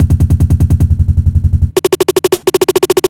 Zajo Loop22 02 overrun-fill-rwrk
a few experiments processing one of the beautiful hip-hop beat uploaded by Zajo (see remix link above)
buffer overrun one shot fill
filter, beat, dnb, processing, break, fill, stutter, breakbeat, remix, loop, electro